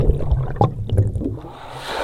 Snippet of underwater sequence recorded with laptop and USB microphone in the Atlantic Ocean with a balloon over the microphone.
field-recording, surf